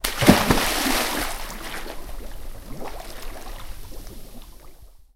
Splash, Jumping, H
Raw audio of someone jumping into a swimming pool. Browse the pack for more variations.
An example of how you might credit is by putting this in the description/credits:
The sound was recorded using a "H1 Zoom recorder" on 14th August 2016.